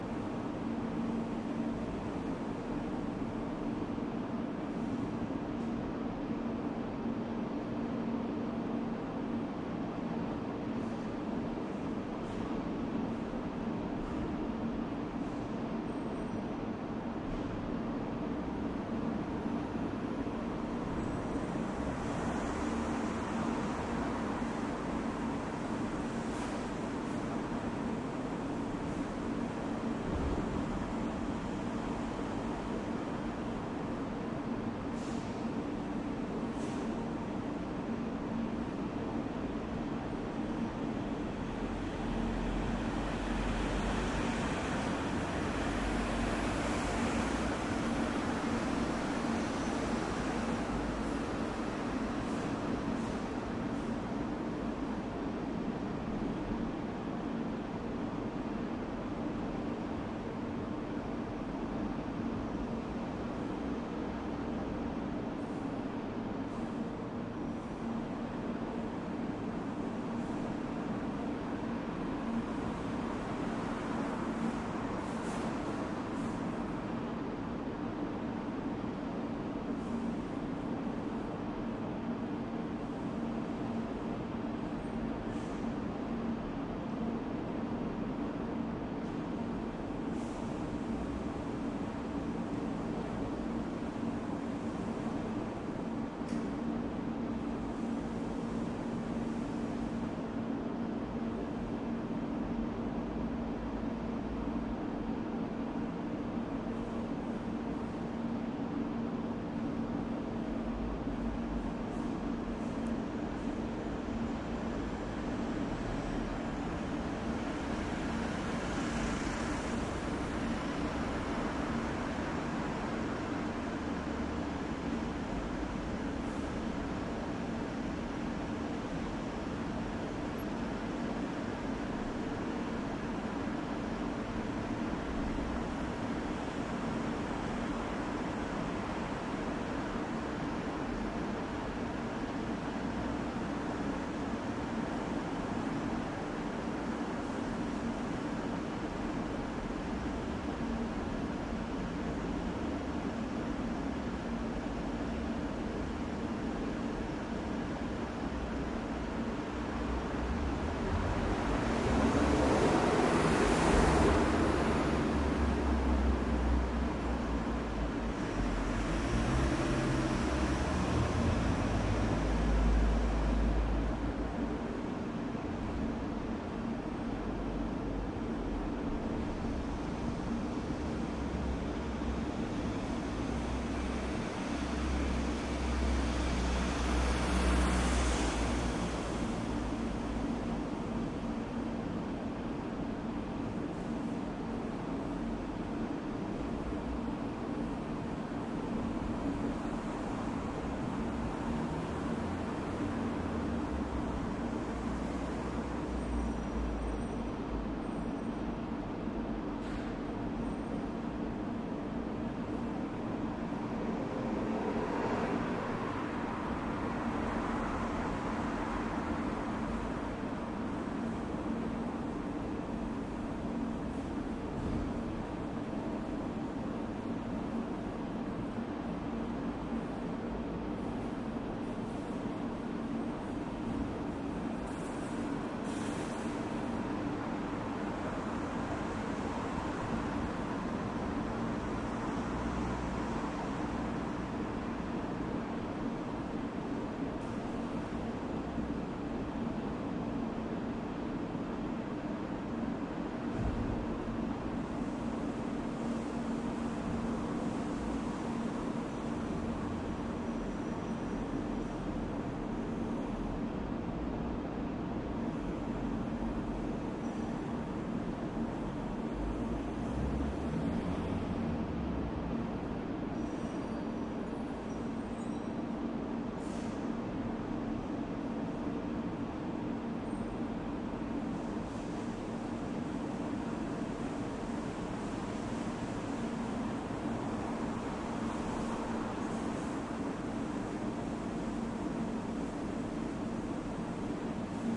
The usual sound of a multi-storey carpark, this time the Ikea variety. Olympus LS-10 recorder.